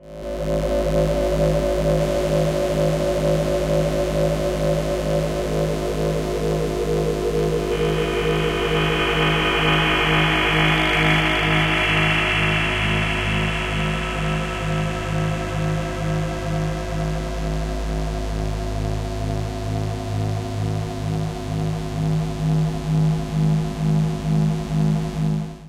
Evolving drone
Made on a Waldorf Q rack